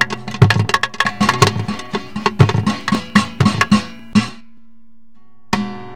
ragga percussion, just like the name. how ironic!